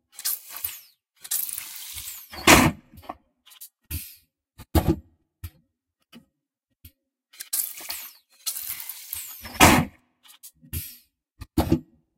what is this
Hydraulic Suction-Sound of a Train-Toilet
"Flushing" a toilet on a train.
Airplane, Bathroom, Flush, Hiss, Hydraulic, Restroom, Suck, Suction, Toilet, Train